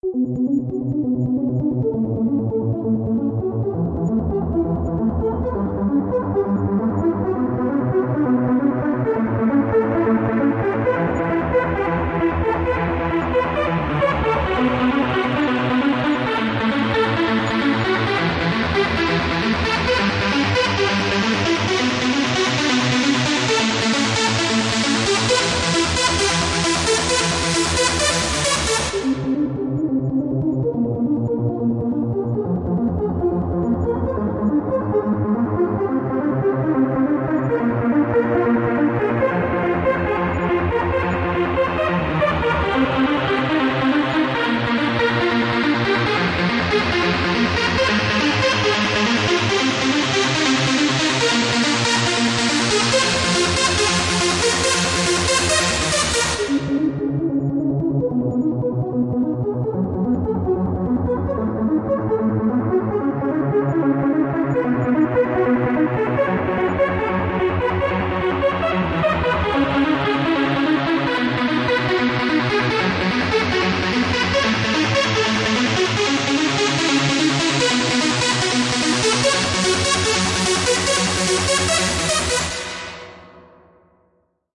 Day 4 4th July Timbre THE LIGHT! INTENSIFIED REMIX
Day 4. WHOA! This sound is a HECK of a sound. (sounds MUCH better when downloaded!) and through various little tweaks in Audacity it is probably even better.
Still sounds good though.
Effects added include:
*reverb
*wah-wah
*high pass
*resampling
Edited in Audacity.
This is a part of the 50 users, 50 days series I am running until 19th August- read all about it here.